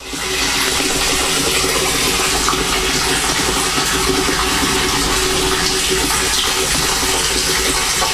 water entering in the deposits so we can drink it!
dragnoise, fluids, liquids, LiquidSky